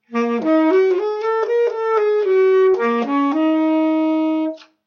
sax-phrase-T5
Tenor sax phrase. 2015 November 13th 16:00hrs, Mexico city.